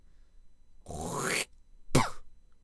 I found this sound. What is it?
NPX Throat Clearing and Spit %22puh%22 2
mouth, vocal, unprocessed, loud, deep